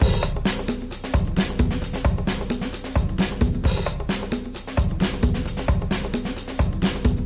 A drumloop I created with Kontakt 5 in Ableton. Well thats, thats funky. Enjoy!